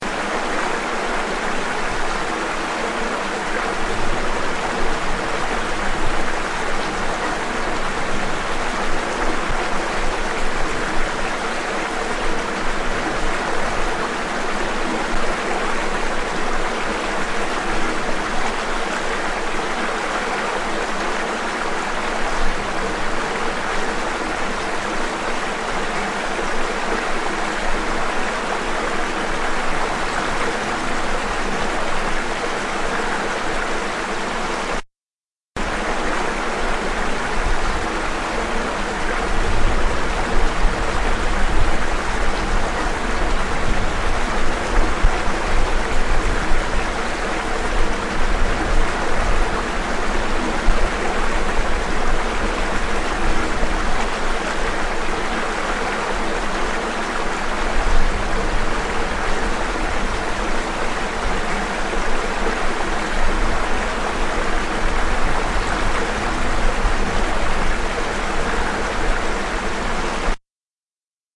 Water Pipe Outfall
Stream culvert outfall onto a beach. Blue Yeti mic onto MacbookAir.
stream, beach, water-outfall